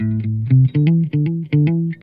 guitar recording for training melodic loop in sample base music
electric, guitar, loop